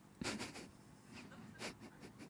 My friend's laughter.